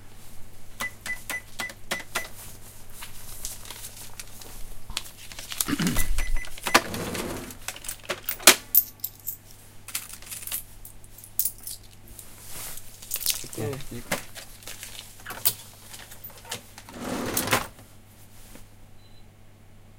atmo small market
Atmo in small market.
Recorded on ZOOM H4N
small, sound, market, asian